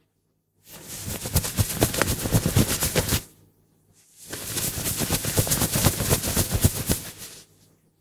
clothing movement
The movement of clothing (jeans)
Recorded with Sony HDR PJ260V then edited with Audacity
cloth, fabric, clothes, moving, army, rustle, pants, jeans, shirt, clothing, rustling, running, movement